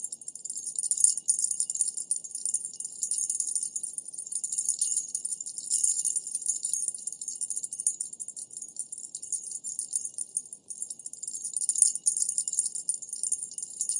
made with a open tambourine and a little effect
coins, money, gold, coin